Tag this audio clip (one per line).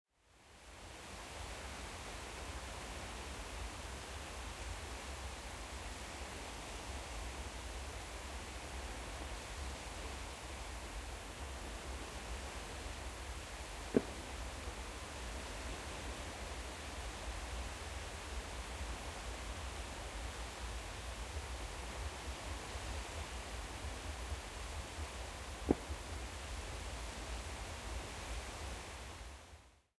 fireworks
newyear
beach